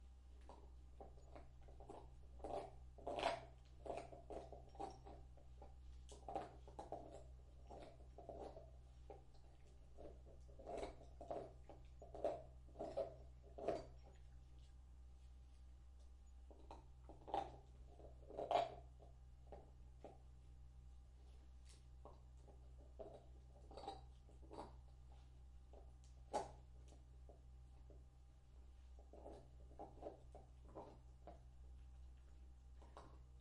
Beagle, bone
Dog eating bone. Right, down. Binaural recording.
beagle, Binaural, bone, dog, dummy-head, headphones